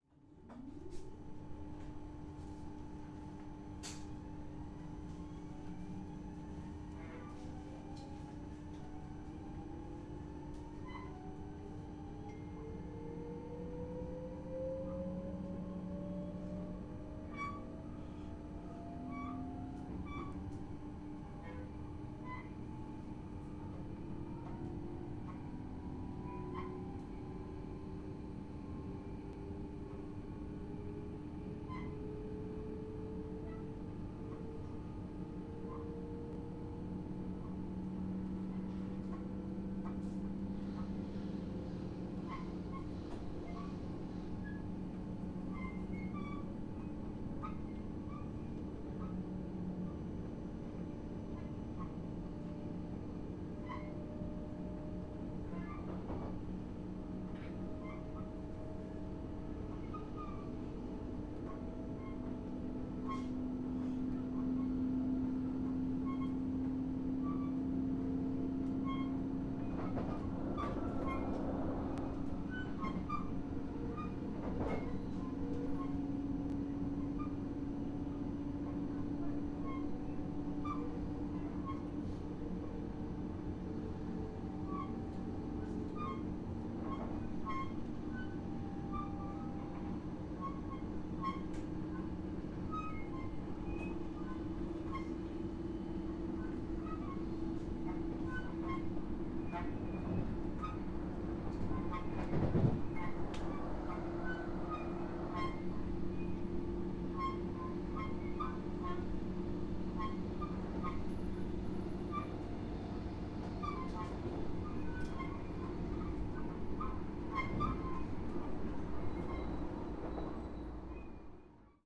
train departure interior
Interior ambience of a intercity train departing.
Recorded with Rode Micro and iPhone Rode app